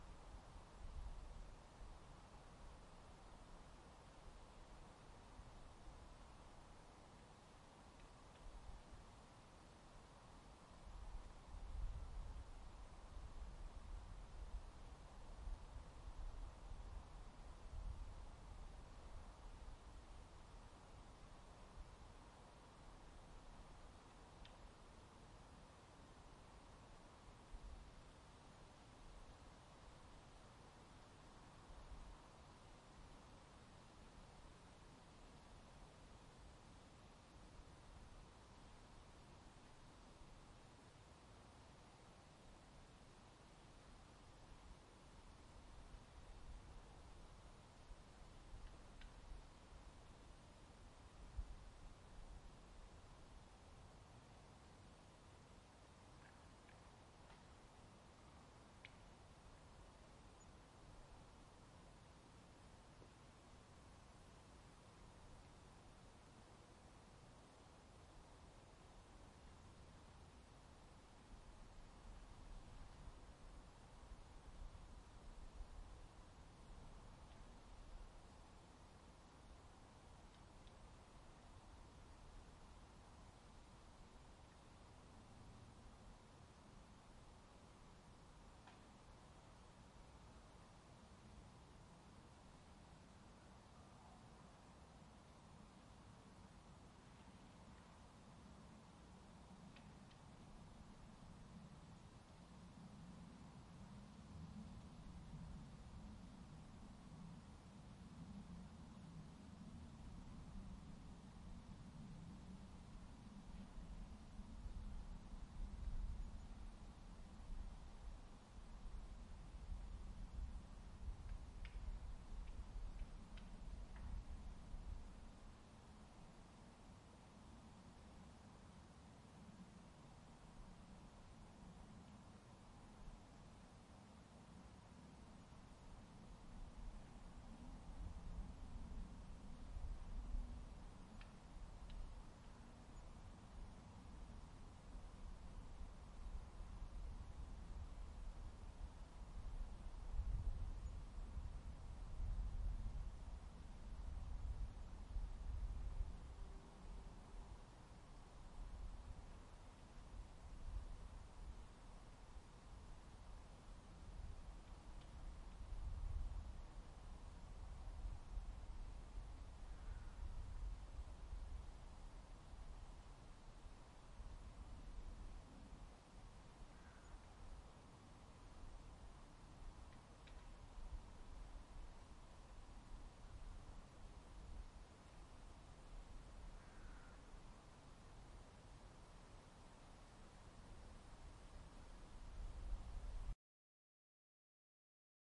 Forest 11(wind)
ambient, forest